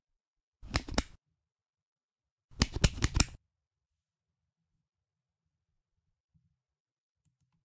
YZ13bicpen
I'm simply clicking my BIC pen.